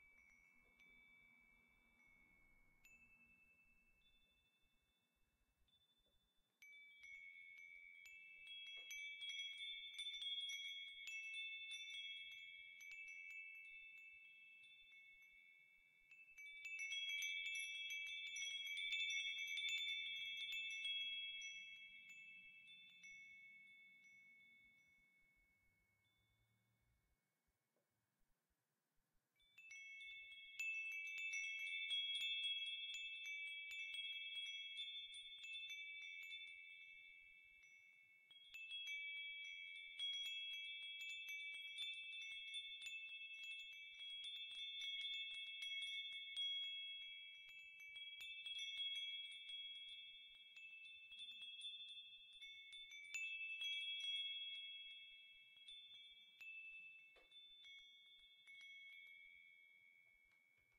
Shining bells
Beautiful bell shining recorded with KM184 (mono)
beautiful, bells, chimes, Shining